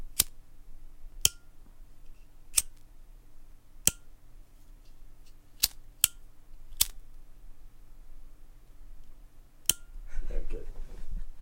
Flicking a Lighter